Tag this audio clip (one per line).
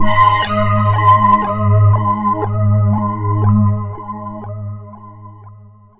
lead
pulsating
multisample
distorted